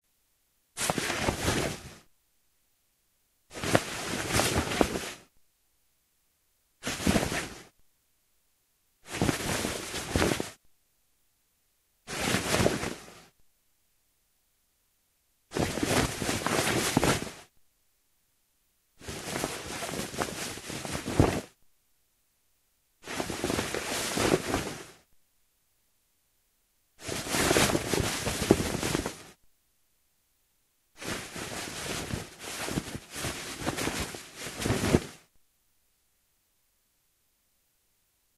Jacket Handling
acrylic, cloth, clothes, clothing, cotton, dressing, foley, handle, jacket, movement, Nylon, rustle